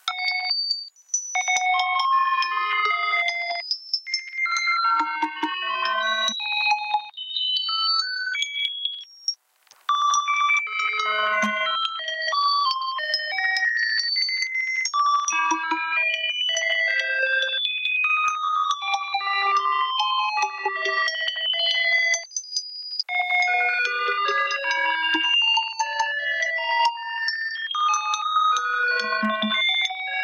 sci fi RING
Old-school computer working on oldschool spaceship. The sound can be also used as cell phone ring. The sound is made on Nord Lead Modular.